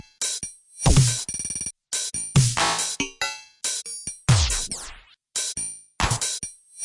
Yes. They are the glitchy bells
8-bit, awesome, chords, digital, drum, hit, loop, loops, music, samples, synth